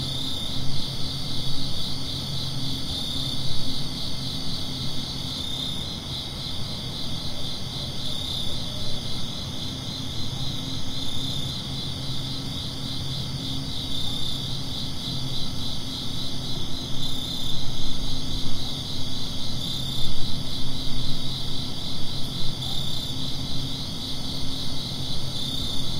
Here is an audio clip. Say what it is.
Ambience - outdoors at night, suburban, with crickets
Recording of general outdoor ambience with crickets chirping and various distant sounds such as heating and air systems running and car traffic.
ambiance, ambience, ambient, background-sound, crickets, field-recording, foley, general-noise, nature, night, outdoors, suburban